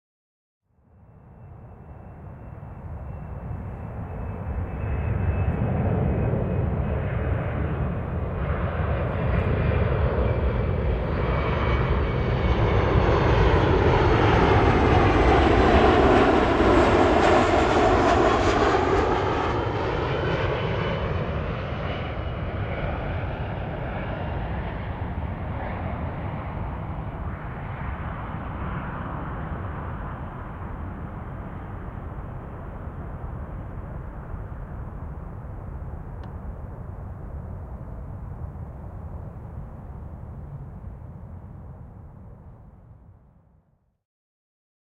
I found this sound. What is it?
Landing jet aircraft pass / Matkustajakone ohi laskussa
Date/aika: 2009
Place/paikka: Helsinki-Vantaa Airport / letokenttä
laskeutuminen, ohittaminen, jet, airplane, lentokone, suihkukone, landing, matkustajakone, pass, plane, laskeutuu, passing, aircraft, ohittaa